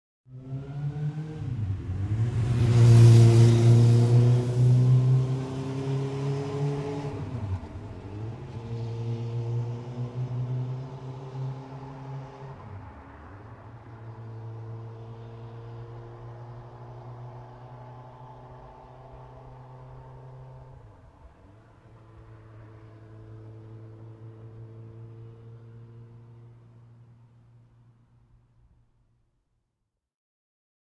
Scooter drive-by
Recorded on Zoom H4N with Rode NTG-3.
The sound a motor scooter driving by on a quiet city street and slowly fading into the distance.
scooter, bike, engine, drive-by, drive, motor, vehicle